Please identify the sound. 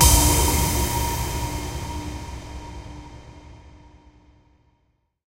this series is about transition sfx, this is stacked sound effects made with xsynth,dex and amsynth, randomized in carla and layered with cymbal samples i recorded a long time ago
crash, cymbal, impact, noise, transition, white, woosh